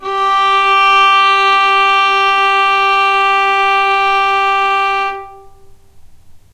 violin arco non vib G3
violin arco non vibrato